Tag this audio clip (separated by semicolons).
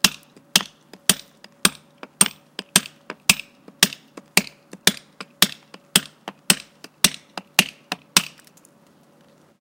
field-recording; noise; tools; unprocessed